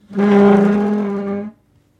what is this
Chair-Stool-Wooden-Dragged-01
The sound of a wooden stool being dragged on a kitchen floor. It may make a good base or sweetener for a monster roar as it has almost a Chewbacca-like sound.
Pulled, Wooden, Stool, Drag, Ceramic, Pushed, Dragged, Wood, Roar, Kitchen, Snarl, Pull, Tile, Push, Monster